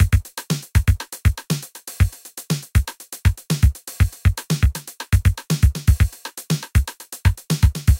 SevenOSeven Dry
A TR 707 drumloop without FX, 80's like, 120 Bpm
Breakdance, Rap, TR, Ableton, Drumloop, Drum-machine, Rythme, Hiphop, Beatbox